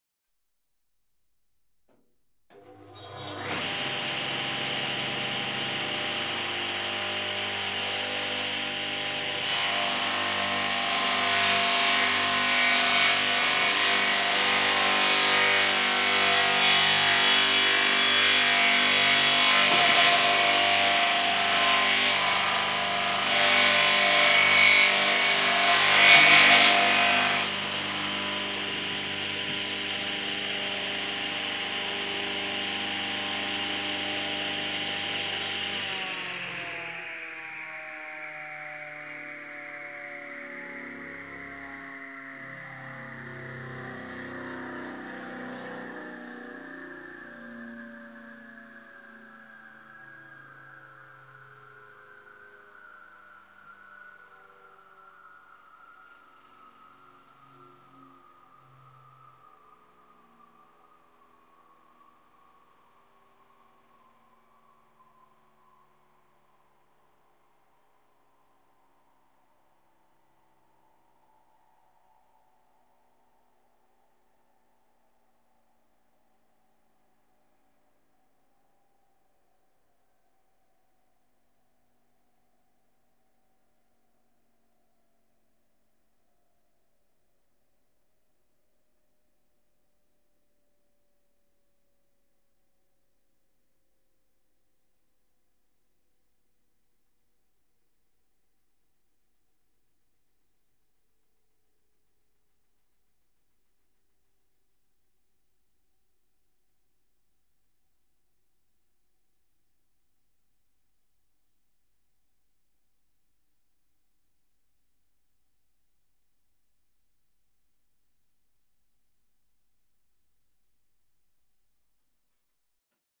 Bench Saw Ripping Once
A stereo field recording of a 2 hp circular bench site (portable)saw ripping Oak. Rode NT4>Fel battery preamp>Zoom H2 line in
machinery, wood, bench-saw, electric, circular-saw, crosscutting, field-recording, woodwork, circular, mechanical, sawing, joinery, cutting, carpentry, machine, saw